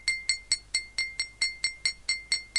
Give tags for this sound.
glass full-glass waterglass water-in-glass water toast

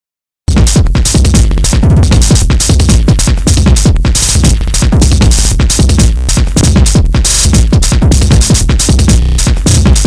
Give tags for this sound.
dnb house stuff

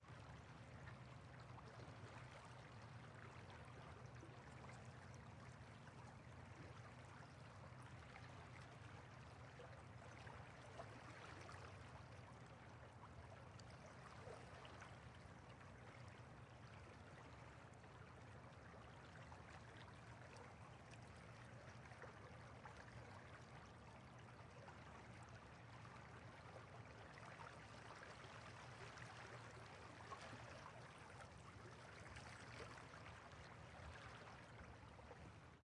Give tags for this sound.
water flowing river flow MS fluss stream

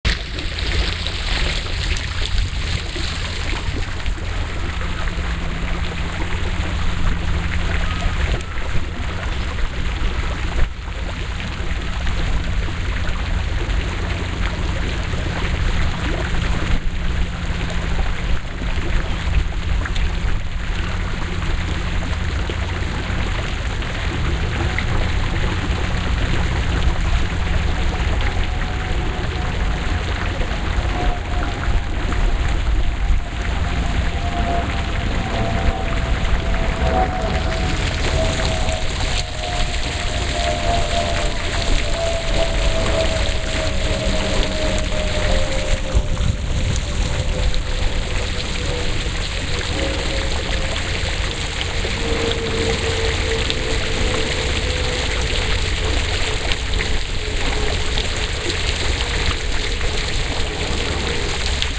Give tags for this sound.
field-recording london soundscape atmosphere background-sound general-noise ambiance ambient ambience

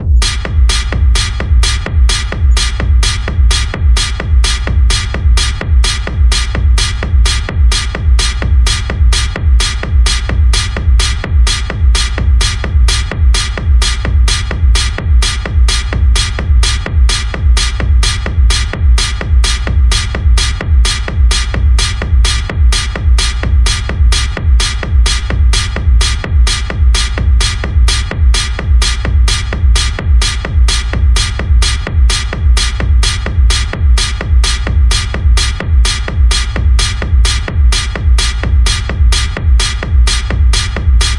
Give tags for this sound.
Dark Design Sound Techno

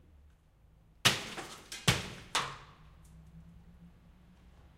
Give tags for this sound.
rooftiles stones tiles rooftile crush construction breaking crushing break field-recording tile stone

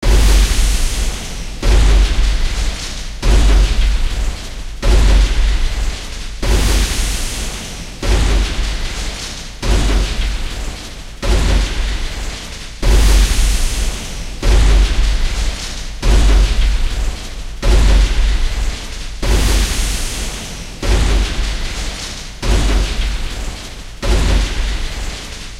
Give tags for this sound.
Large Machine Stomp Bass

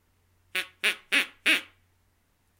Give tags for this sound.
duck fake quack

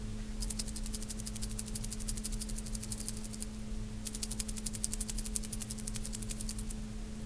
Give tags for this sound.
manipulated MTC500-M002-s14 pitch keys

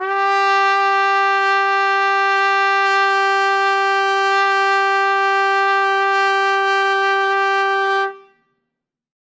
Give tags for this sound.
brass fsharp4 midi-note-67 midi-velocity-95 multisample single-note sustain trumpet vsco-2

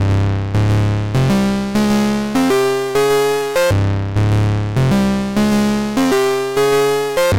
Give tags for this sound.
Analog Bass Drum Electronic Thicker

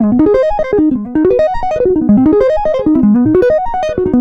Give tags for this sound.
picking guitar clean sweeping sweep

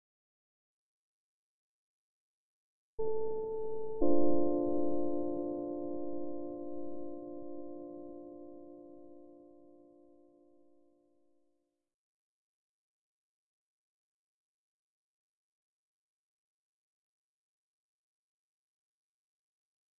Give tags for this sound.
electric-piano ambient soundscape

